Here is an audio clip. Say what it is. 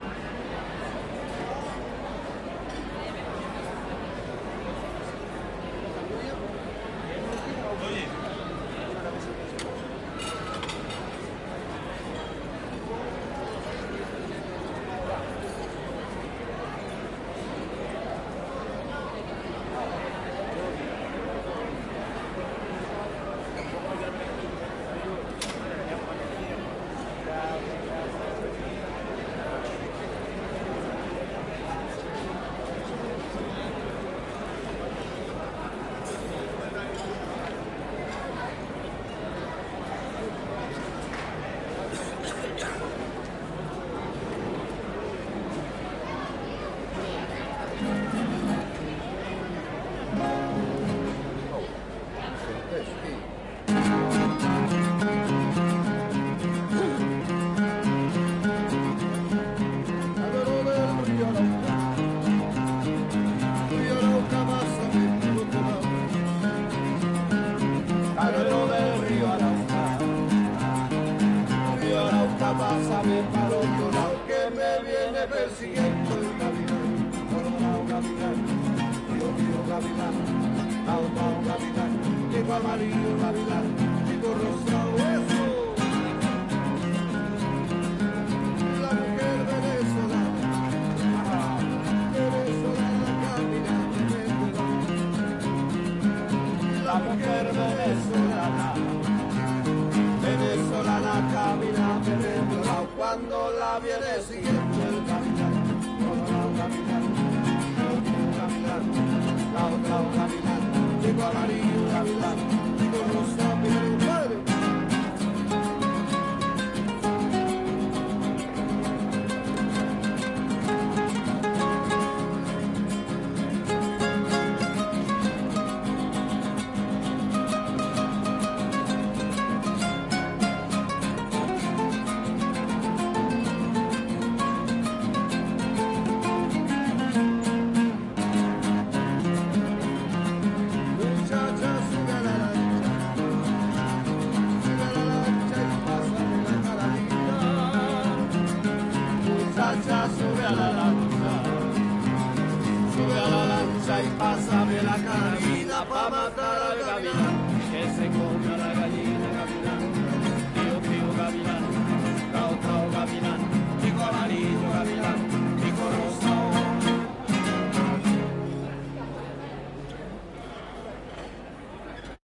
mercado central 07 - el gavilan
Mercado Central, Santiago de Chile, 11 de Agosto 2011. Un dúo de guitarras interpreta la cumbia El Gavilan.
Music from the restaurants.